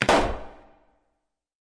Balloon-Burst-04-edit
Balloon popping. Recorded with Zoom H4